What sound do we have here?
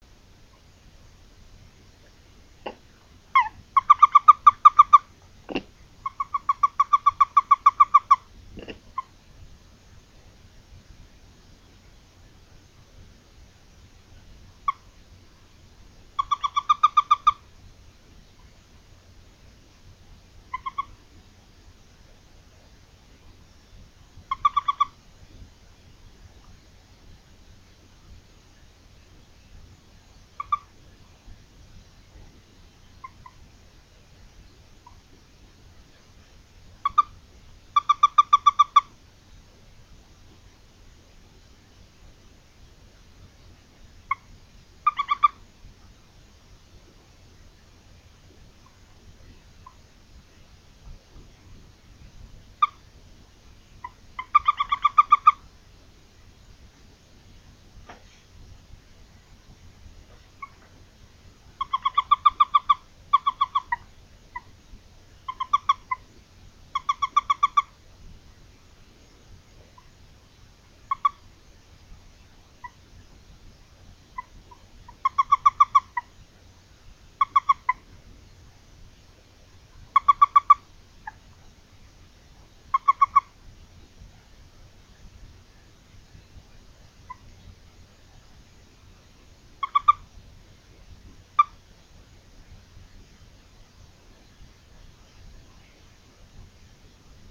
Toad Distress
It was raining and I caught this toad. I figure he didn't like being handled, and was making that noise because he was upset.
distress, toad